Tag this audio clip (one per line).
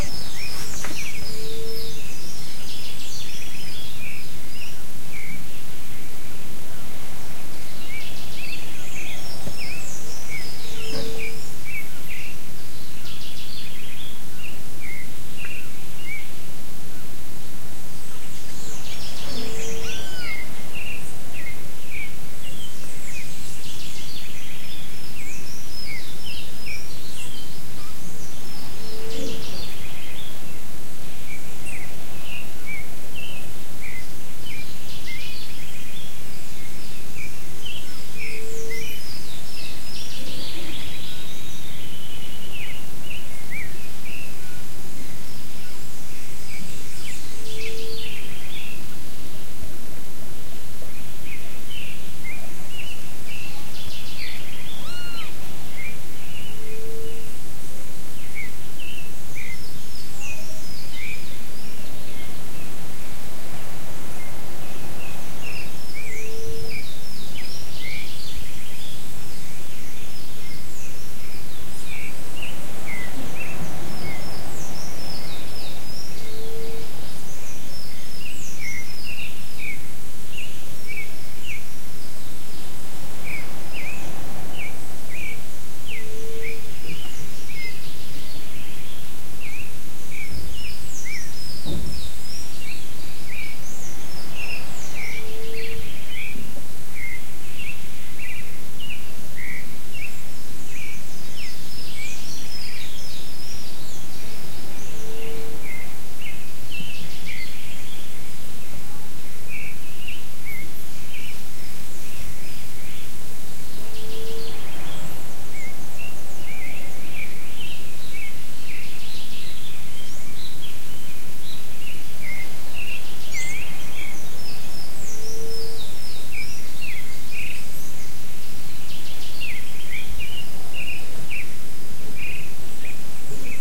field-recording
brazil
birds
bird
ilha-grande
rio-de-janeiro
morning